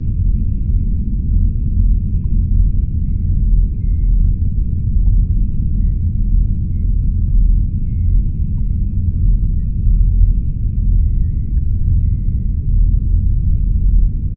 Nostromo is the spaceship of the film Alien. I like a lot the ambience
background that can be heard "in silence". It inspired to me in order
to create a "similar" kind of "room tone" using a Roland JD-800
synthesizer.
ambience, background-noise, deep, jd-800, low, room-tone, silence, spaceship